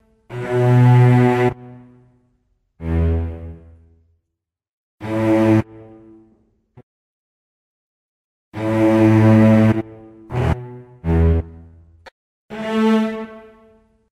These are string samples used in the ccMixter track, Corrina (Film Noir Mix)